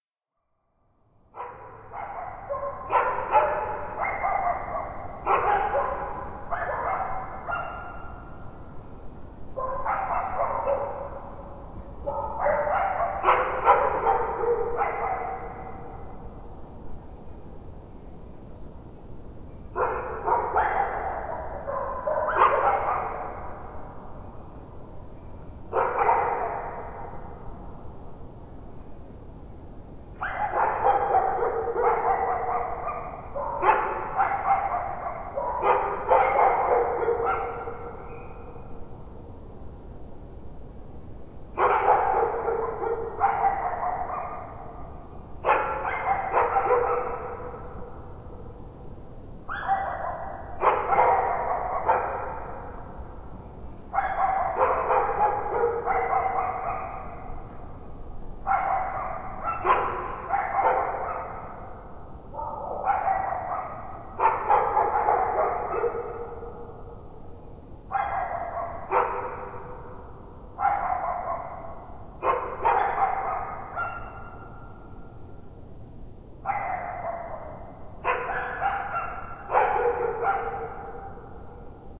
Three distant dogs barking at night.
Recorded with a Sony HI-MD / MZ-RH1 Minidisc recorder and using a Sony EMC-MS907 mic.
emc-ms907, field-recording, mz-rh1, sony